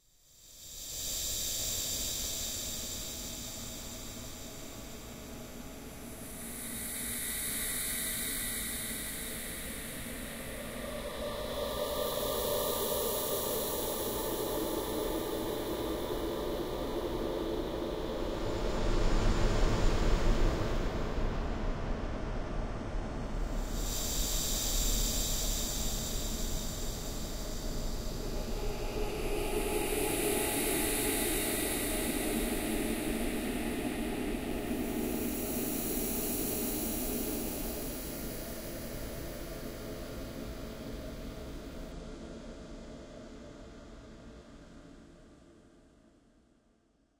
DABEL Jérémy 2016 2017 screamOfSouls
An ambient sound that creates a ghostly atmosphere where souls are screaming from beyond.
I used the sound of someone who chews gum and a sound of a scary laugh, I added the Paulstrech effect on both of them to create this ghostly atmosphere. I optimized the result by adding some reverb and by low or high pitching them.
• Typologie (Cf. Pierre Schaeffer) : continu varié (V)
• Morphologie (Cf. Pierre Schaeffer) :
1. Masse : son cannelés
2. Timbre harmonique : perçant
3. Grain : lisse
4. Allure : sans vibrato
5. Dynamique : douce
6. Profil mélodique : variation serpentine
7. Profil de masse : site, différentes variations
ambiant, creatures, creepy, fear, fearful, ghost, ghostly, halloween, haunted, horror, nightmare, nowhere, paranormal, phantom, scary, sinister, spectre, thriller